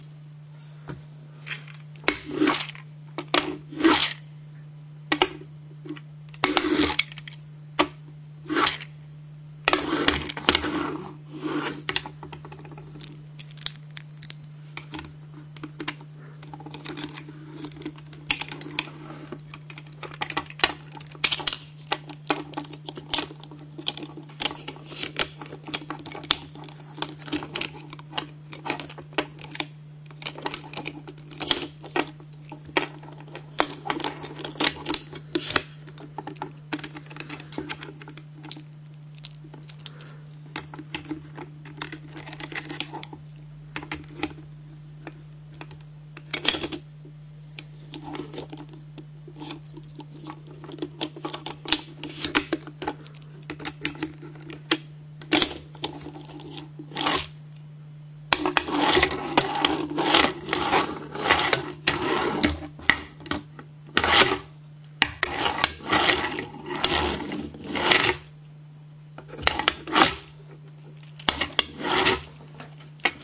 A plastic transformer-type toy car. It is rolled around the table, and "transformed", which produces a spring-loaded clicking/swiping noise as it unfolds.
Recorded with a Canon GL-2 internal microphone.
unprocessed, roll, slide, roller, plastic, spring-loaded, click, whoosh, toys, request, toy